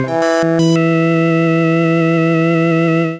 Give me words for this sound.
PPG 010 Little Mad Dance G#4
This sample is part of the "PPG
MULTISAMPLE 010 Little Mad Dance" sample pack. It is a digital sound
with a melodic element in it and some wild variations when changing
from pitch across the keyboard. Especially the higher notes on the
keyboard have some harsh digital distortion. In the sample pack there
are 16 samples evenly spread across 5 octaves (C1 till C6). The note in
the sample name (C, E or G#) does not indicate the pitch of the sound
but the key on my keyboard. The sound was created on the PPG VSTi. After that normalising and fades where applied within Cubase SX.
digital, experimental, harsh, melody, multisample, ppg